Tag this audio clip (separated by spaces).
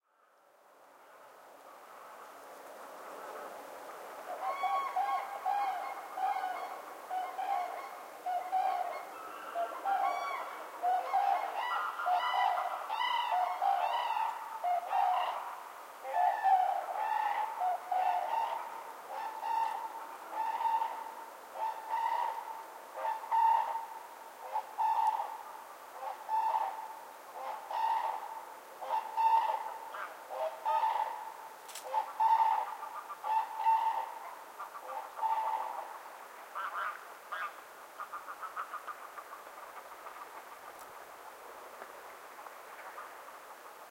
ambiance ambience ambient atmosphere bird bird-lake birds bird-sea birdsong crane crane-dance cranes field-recording flying general-noise nature Sounds soundscape spring wings